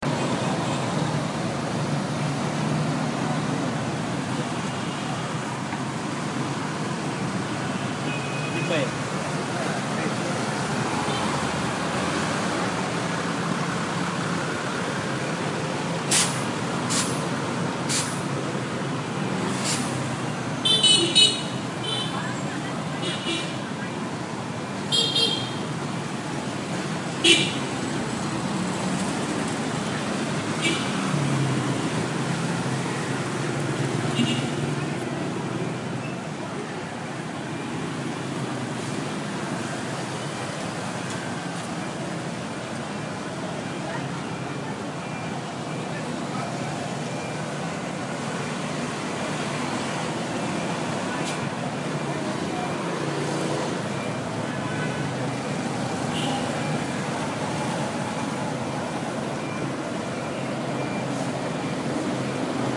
Hanoi city traffic - 1
City traffic recorded using an iPhone, Hanoi, Vietnam
ambience, city, field-recording, Hanoi, street, traffic